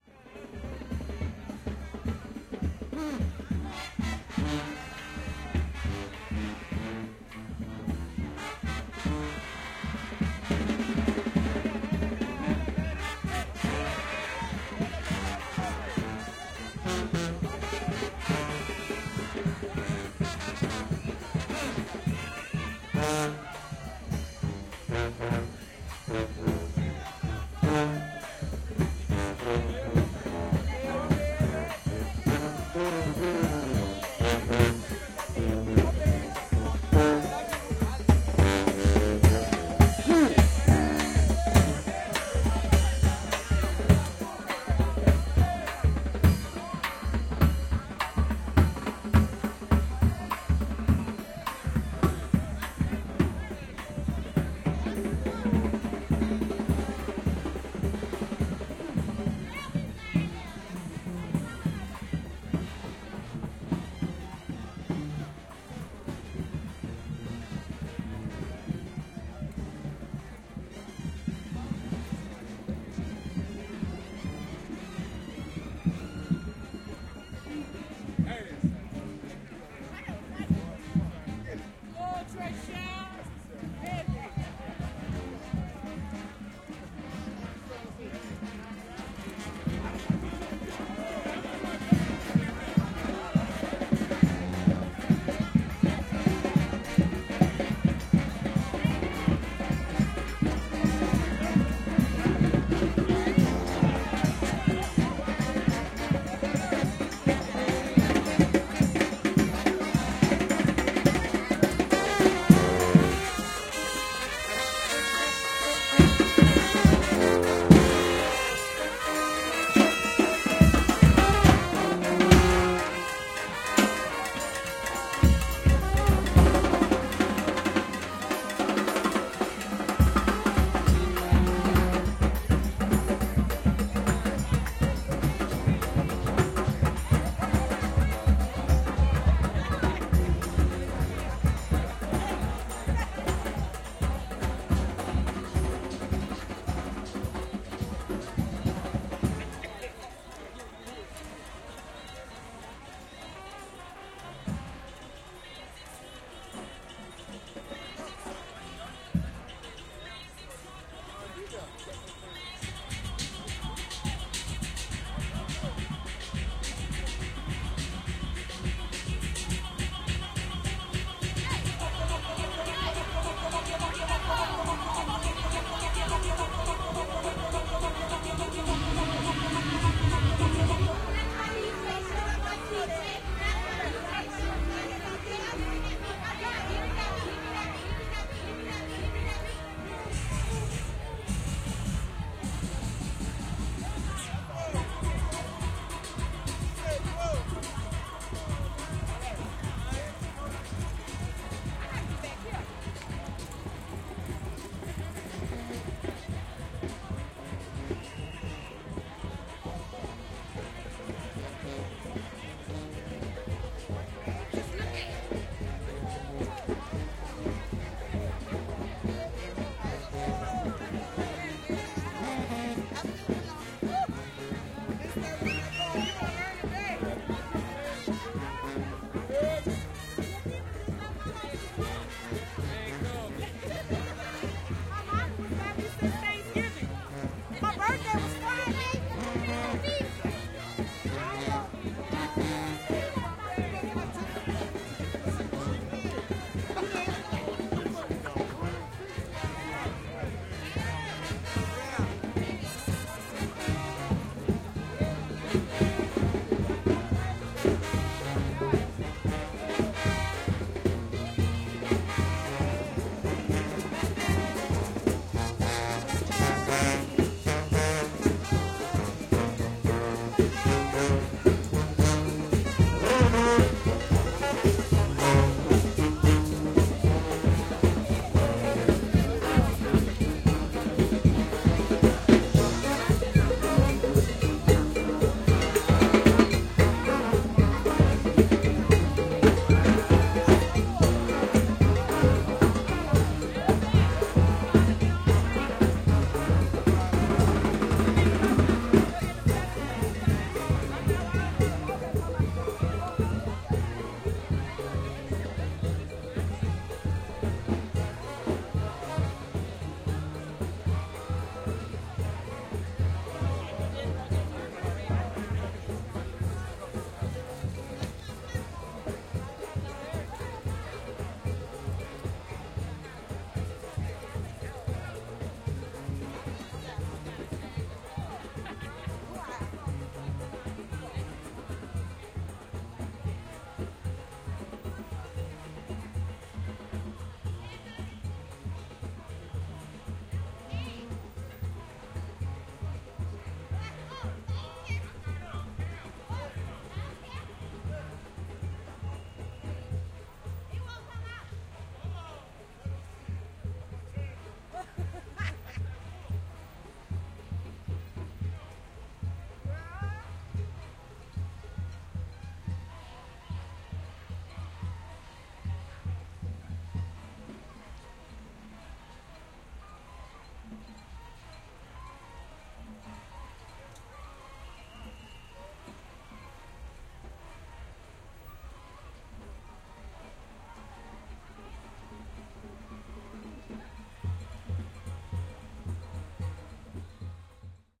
Music Second line parade - brass band - binaural stereo recording DPA4060 NAGRA SD - 2011 11 27 New Orleas
Parade is passing from right/middle to left.